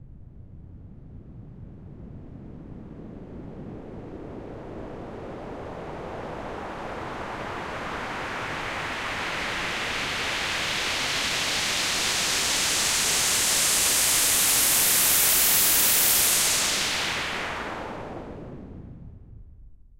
Simple white noise sweep.
Whoosh, Riser, Sweep